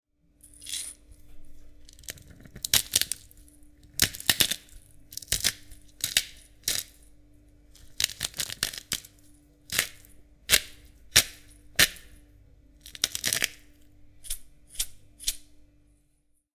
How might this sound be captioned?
pepper mill
kitchen, mill, pepper